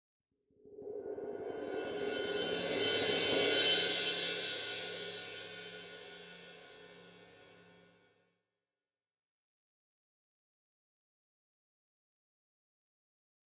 cymbal roll quiet
Crescendo roll from soft to medium on 19" crash cymbal with mallets
cymbal, cymbal-roll, medium, soft